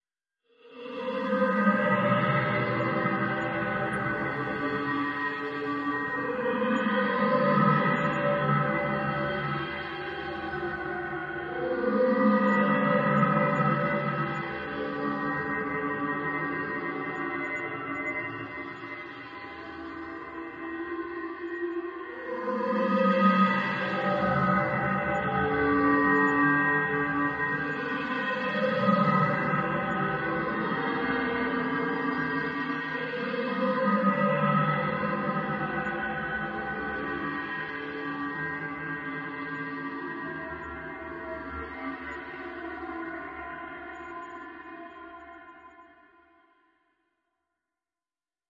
Space Shuttle
science, sci-fi, drone, space-shuttle, electronic, fantasy, soundscape, deep-space, machine, shuttle, deep, space, mystic, dark, atmosphere